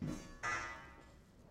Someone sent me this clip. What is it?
SCAFF POLES TO GROUND-01
Scaffolding poles being thrown to the ground. Quite off mic, so there's a bit of ambient reverb coming back.